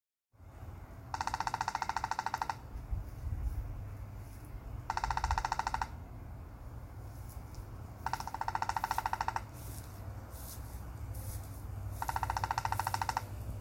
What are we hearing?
A woodpecker pecking at a dead cedar branch in Florida